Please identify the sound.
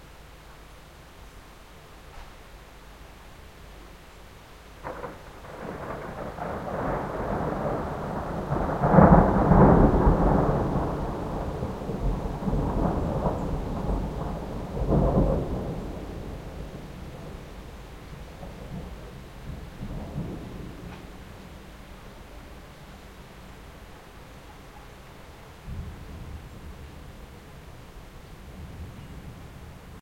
One of the thunderclaps during a thunderstorm that passed Amsterdam in the morning of the 10Th of July 2007. Recorded with an Edirol-cs15 mic. on my balcony plugged into an Edirol R09.
field-recording, nature, rain, streetnoise, thunder, thunderclap, thunderstorm